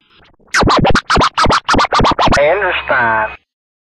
Scratch made with AnalogX program and voice recorded on old cordless phone on 1400 AM to tape around 1988.